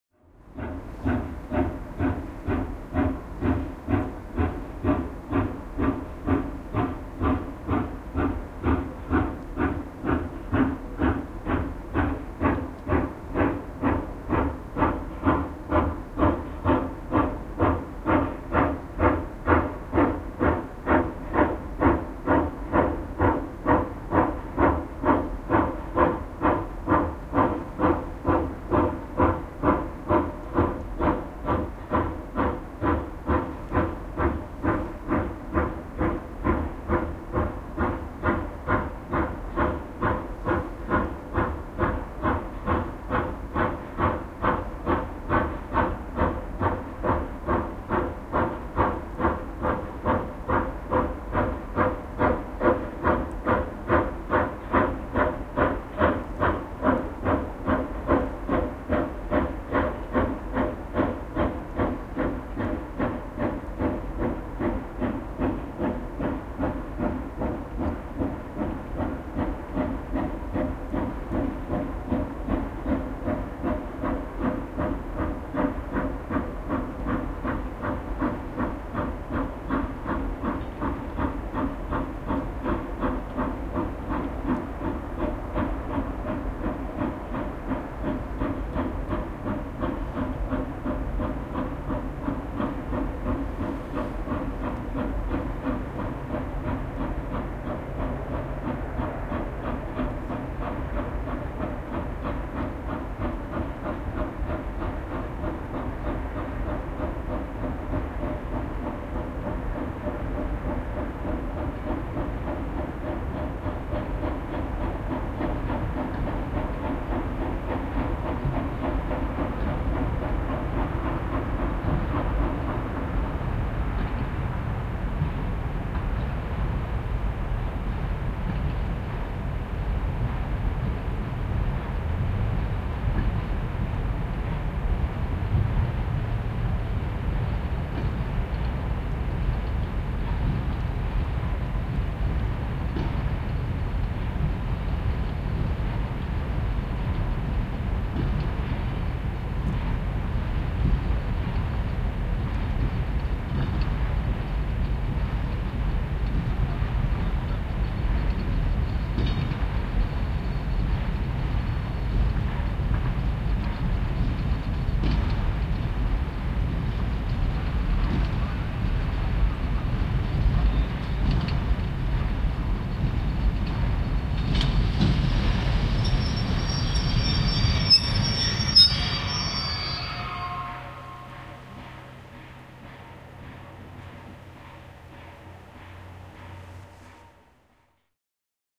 Höyryveturi kulkee kaukana puuskuttaen. Lopussa pysähdys kiskot vinkuen. Ulko.
Paikka/Place: Suomi / Finland / Karjaa
Aika/Date: 1990